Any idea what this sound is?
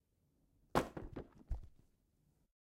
Falling books
A bunch of books falling onto a carpeted floor after being taken out of a bag. Recorded on a Zoom H6 portable digital recorder, rifle microphone.